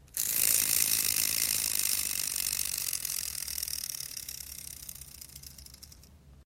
Small motor; close
Small water toy motor running and fading out
small-motor, toy